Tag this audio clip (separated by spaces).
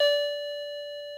lofi melody soundtoy